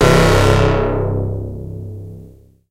sfx-threat-1
Made with a KORG minilogue
effect, fx, game, sfx, sound, synthesizer